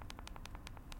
texting on a phone
phone, texting